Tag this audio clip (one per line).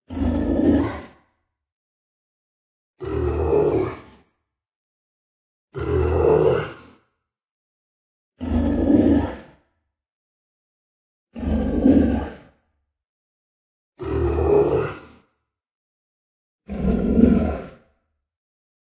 Creature
Beast
Growling
Monster
Animal
Growl